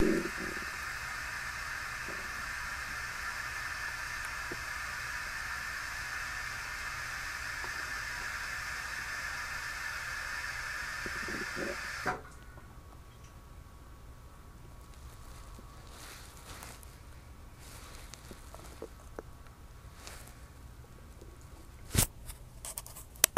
Raw recordings of guts making digestion noises, unedited except to convert usable format.
gas, intestines, rumbling